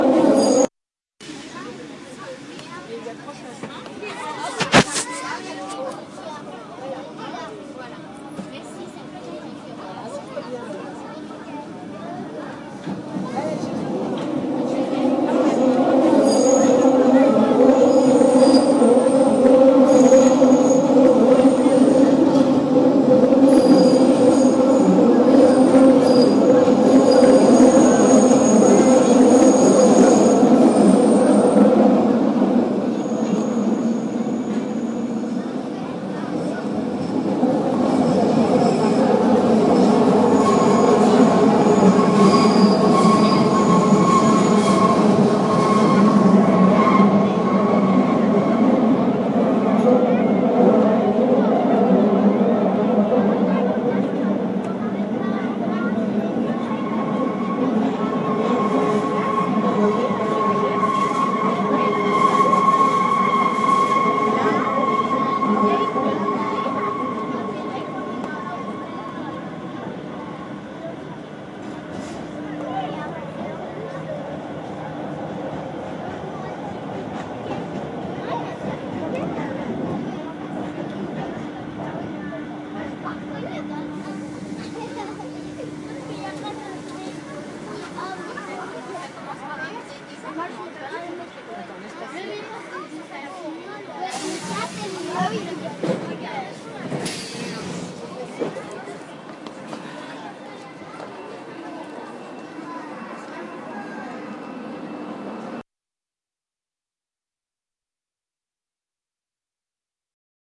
Subway Paris
recording made with a simple Zoom H4 in the subway in Paris.